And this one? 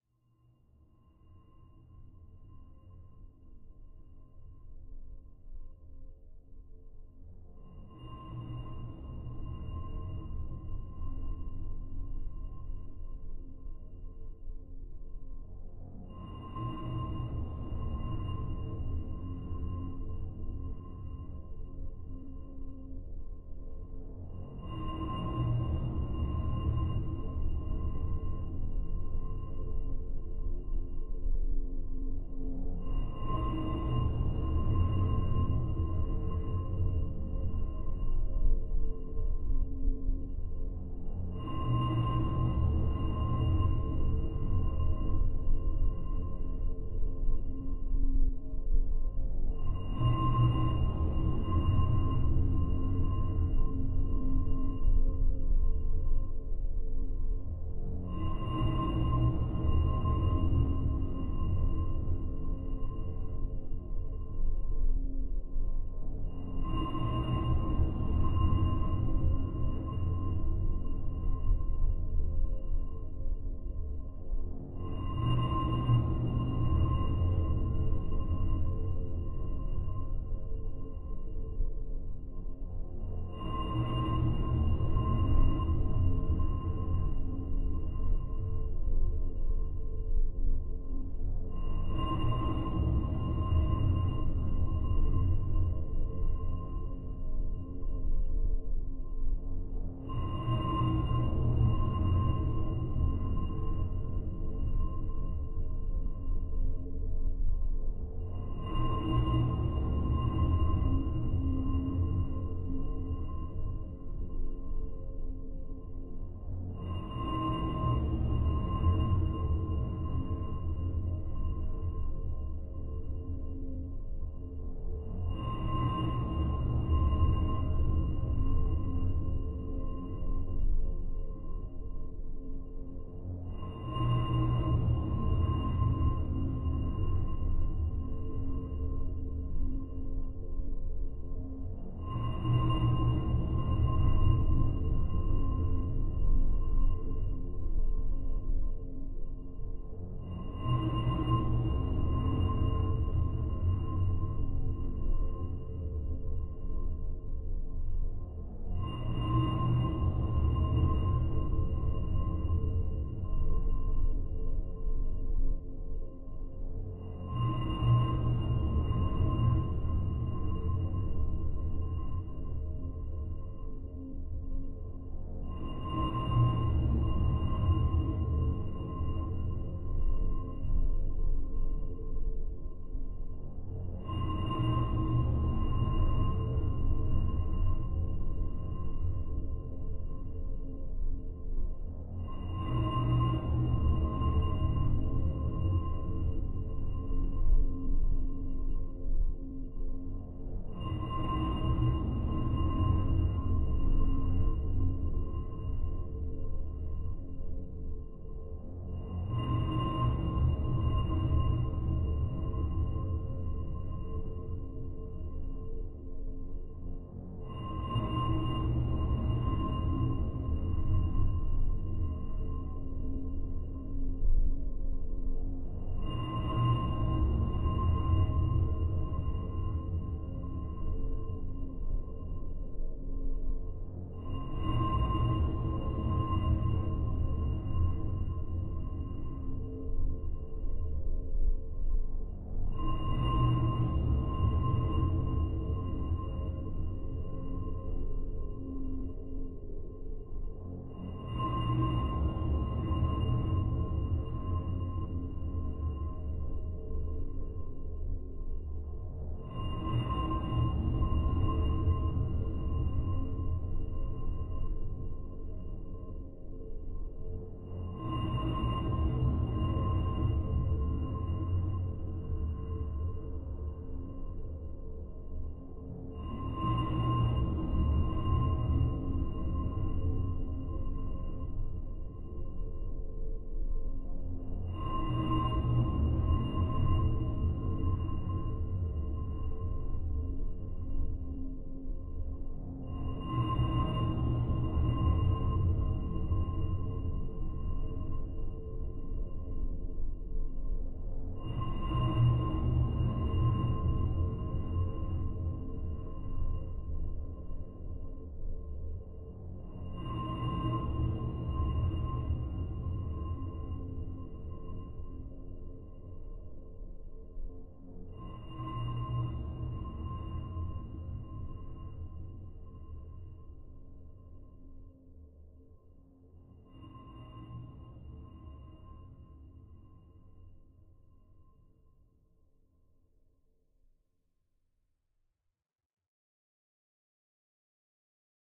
Dark Ambient 018
ambience, ambient, atmos, atmosphere, background, background-sound, dark, soundscape